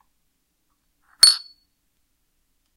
Pestle falling against rim of mortar. Both objects made of porcelain.